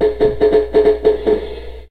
Percussion kit and loops made with various baby toys recorded with 3 different condenser microphones and edited in Wavosaur.
drum, kit, percussion, roll